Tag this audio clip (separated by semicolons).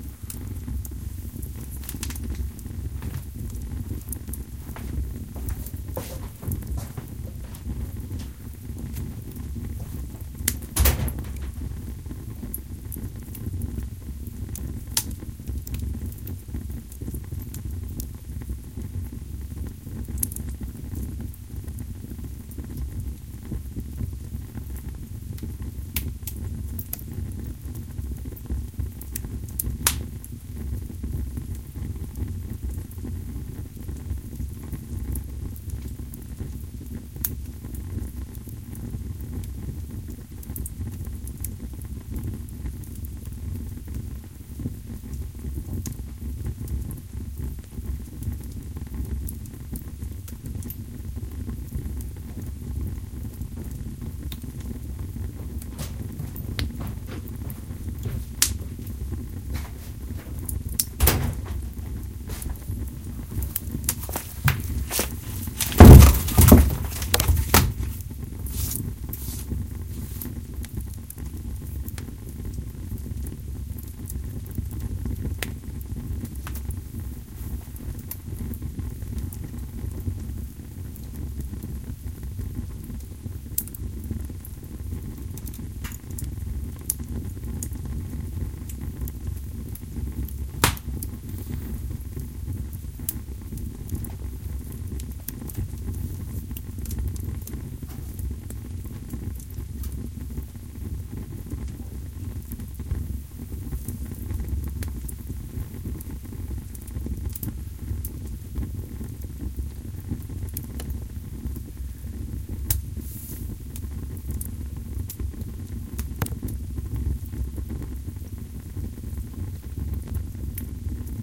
atmospheric; door; fire; wood